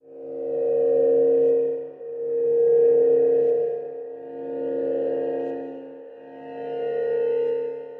Distant Machine 4/4 120bpm
This is a piece of sound design I made to represent a distant pulsating machine-like noise.
effect, sound-design, background, texture, sounddesign, abstract, drone